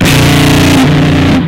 A note on the guitar, with distortion.